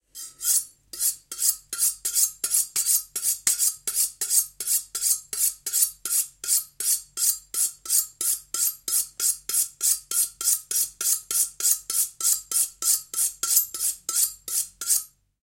Knife Sharpening2
LM49990, EM172, vegetables, Primo, chef